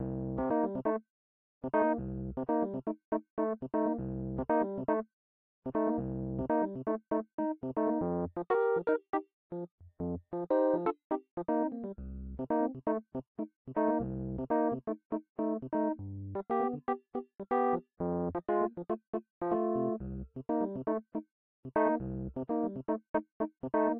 Song1 RHODES Do 4:4 120bpms
120, Chord, Do, HearHear, Rhodes, beat, blues, bpm, loop, rythm